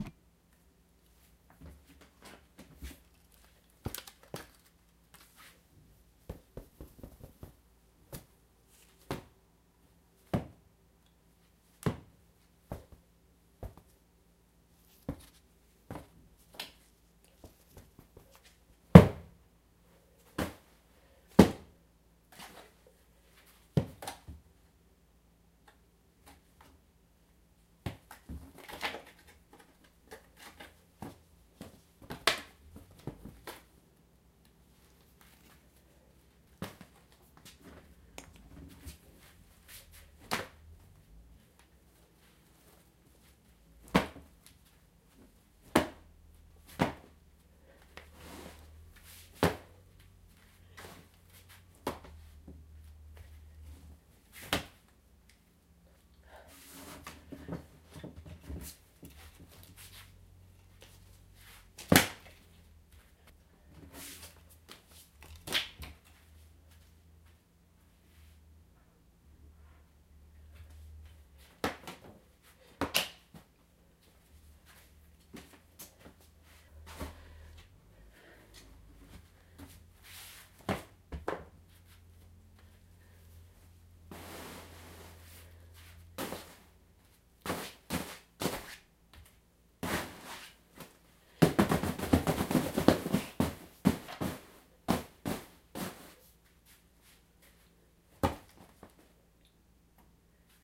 Hits on stuffed animals, recorded with a Zoom H2N